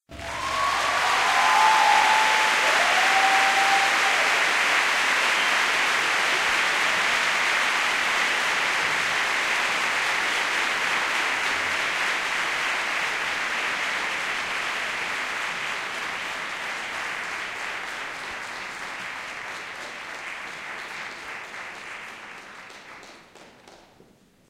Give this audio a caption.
Roar of the crowd
A very large applause sound I created by mixing recordinghopkins high quality applause samples.
applause, cheer, clap, crowd, roar, scream, whoop, yell